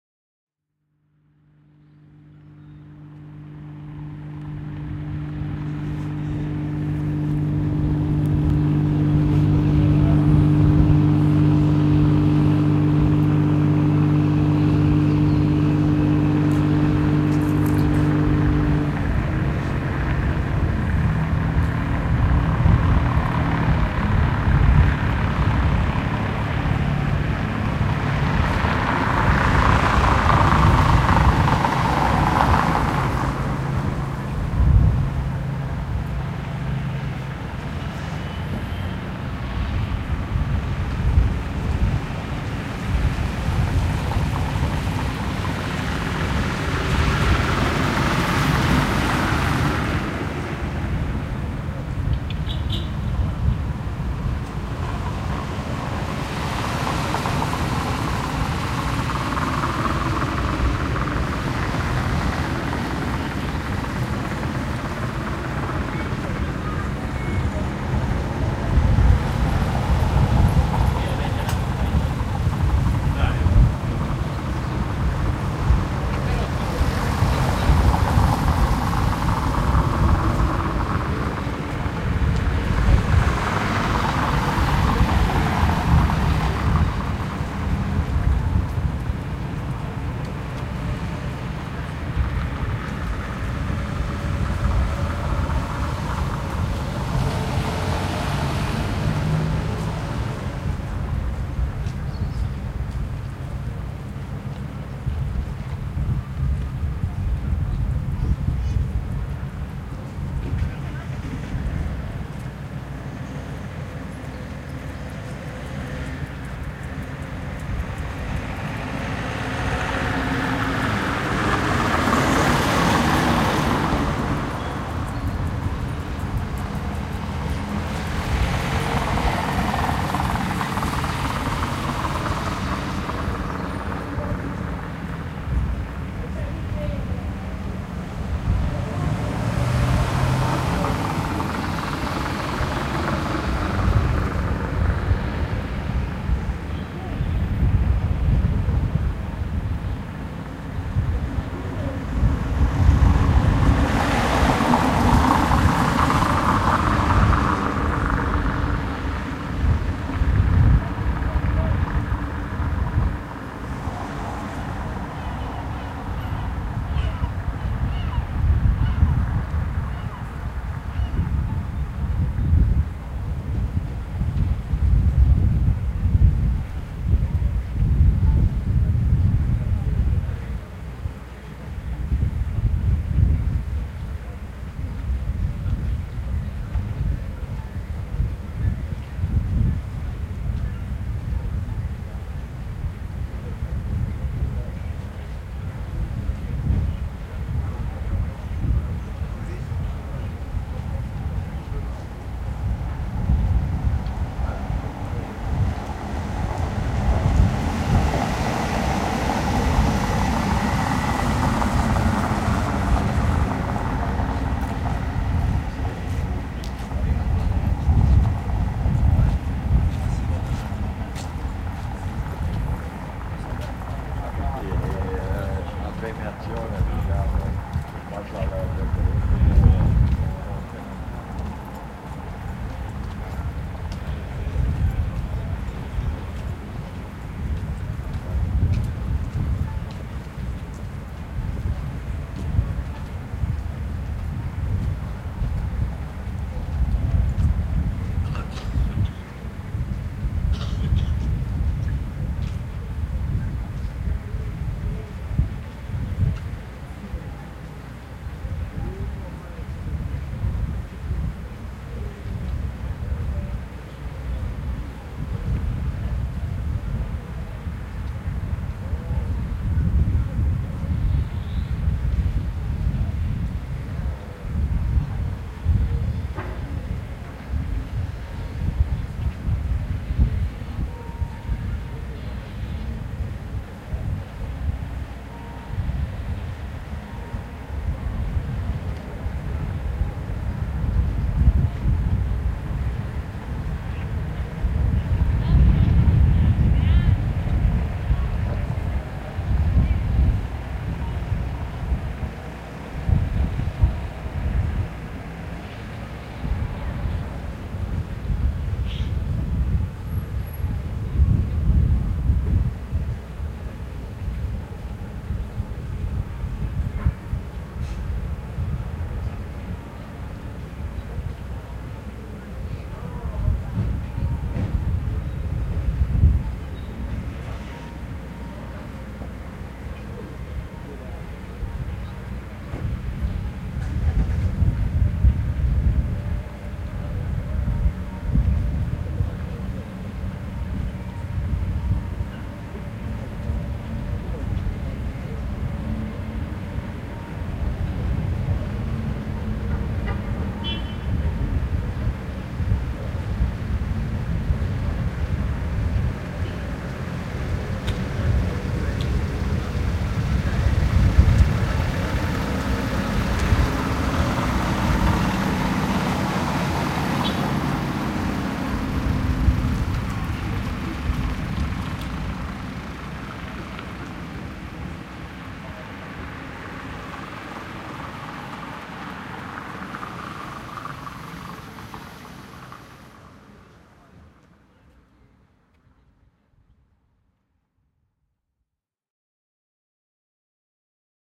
date: 2011, 30th Dec.
time: 11:00 AM
gear: Zoom H4 + Rycote MINI Windjammer
place: Castellammare del Golfo (Trapani)
description: Recording of the passage of people and small vehicles on the dock of the port of Castellammare. Registration is very windy and in the foreground you can hear the sound of small boats go by. Vehicles of sea and land are mixed together.
Trapani, Castellammare-del-golfo, wind, harbor, people-speaking, boats, rural, marina